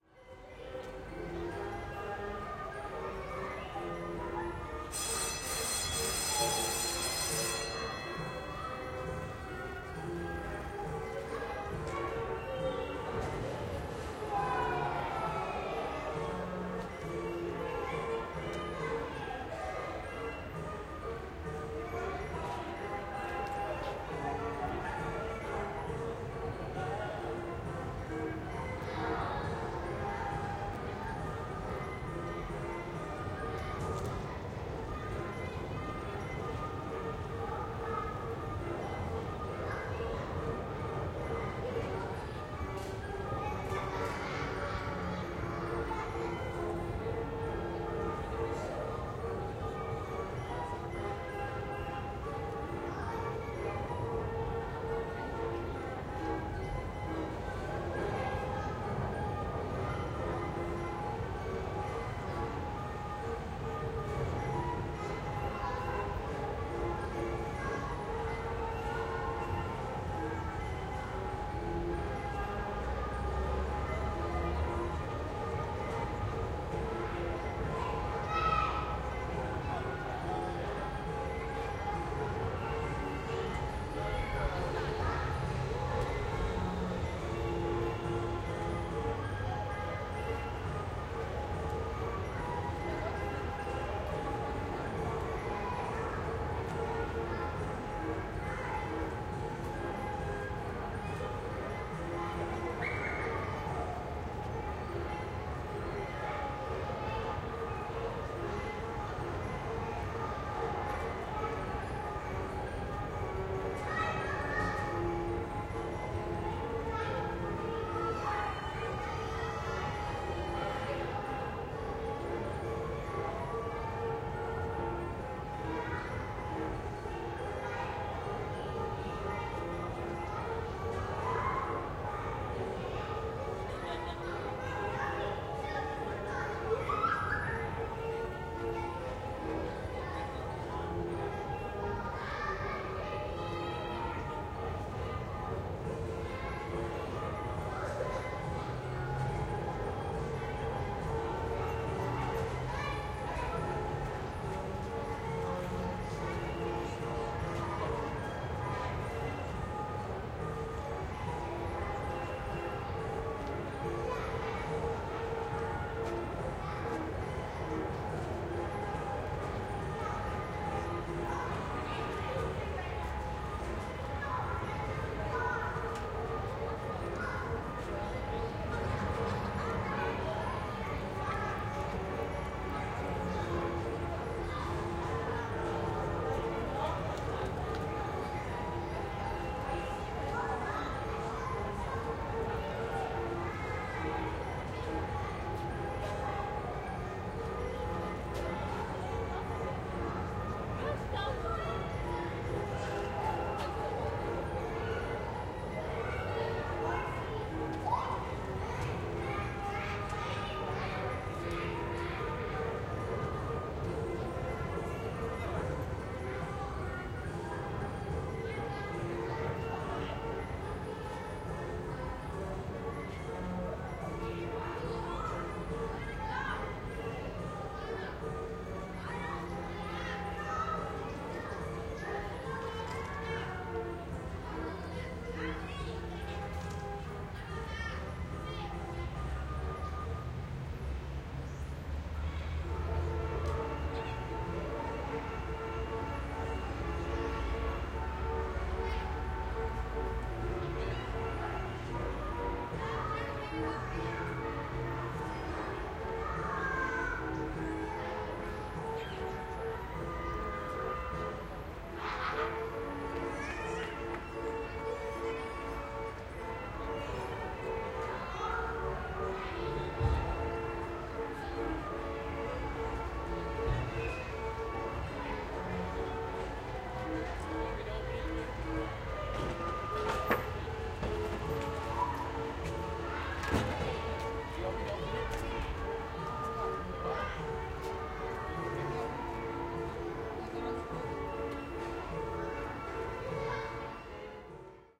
A field recording of the carousel in Golden Gate Park Koret Children’s Quarter, including the startup bell and kids cheering when the carousel begins to move.